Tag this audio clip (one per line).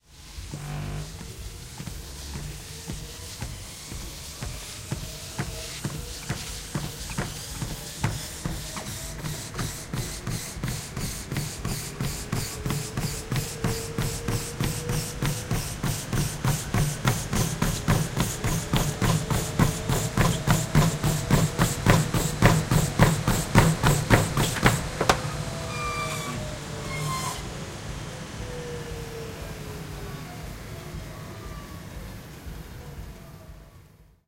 running
machine
run
footsteps
up
jog
exercise
gym
jogging
slow
speed